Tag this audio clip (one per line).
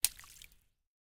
hit
splash